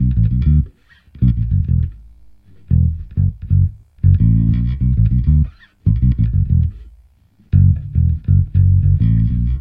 FunkBass GrooveLo0p Cm 1
Funk Bass Groove | Fender Jazz Bass
Drums, Bass, Bass-Samples, Ableton-Bass, Jazz-Bass, Synth-Loop, Funky-Bass-Loop, Logic-Loop, Fender-Jazz-Bass, Fender-PBass, Ableton-Loop, Funk-Bass, Groove, Bass-Groove, Soul, Loop-Bass, Fretless, Compressor, New-Bass, Funk, Bass-Recording, Bass-Loop, Beat, Synth-Bass, Bass-Sample, Hip-Hop